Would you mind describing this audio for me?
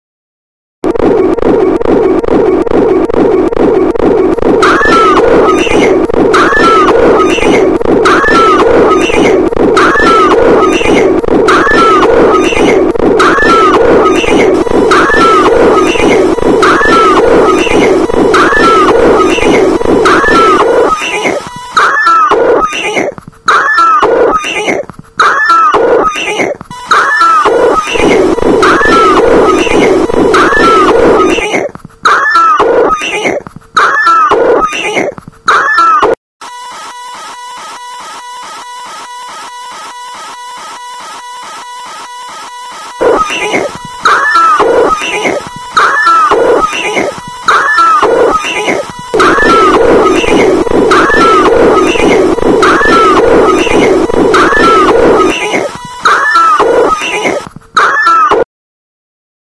Vintage sci-fi ray with monsters
A very very cool vintage ray noise (used to make monsters bigger, to shrink kids, to destroy rocks and planets and to promote the world domination) in the first seconds of the archive and some experimentation later, like a monster screaming. The ray is perfect to make the sfx of gun or a machine in a sci-fi movie.
Some of the sounds are present in the archive in separated layers also. All the sound are “loopable”.
Made in a samsung cell phone (S3 mini), using looper app, my voice and body and ambient noises.
lo-fi, laser, weird, nerd, screaming, x-ray, geek, scream, mad, monster, ray, freaky, canon, killer, killing, experimentation, future, futuristic, scientist, vintage, laboratory, electric, engine, gun